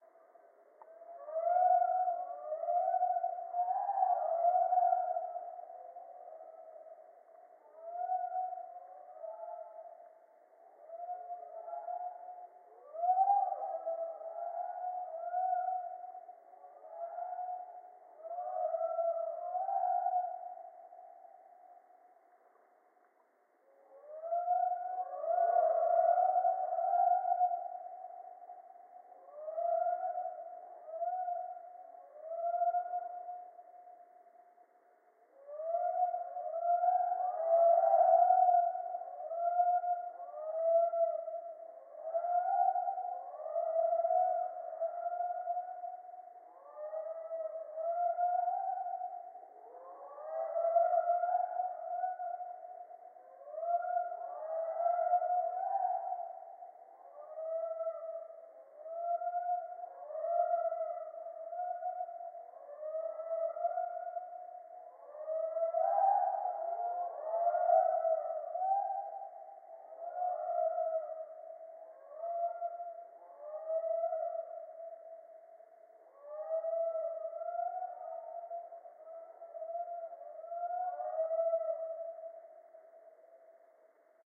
New Holland Honeyeaters (Phylidonyris novaehollandiae) slowed 32 times in edison.